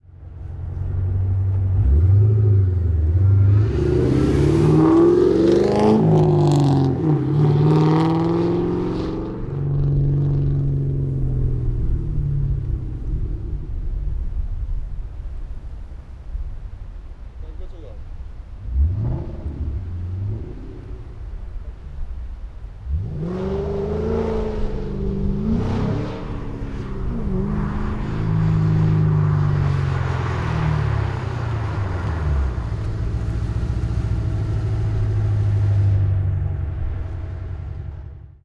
Sound of a Mustang GT500. Recorded on the Roland R4 PRO with Sennheiser MKH60.